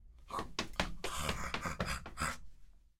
some creature running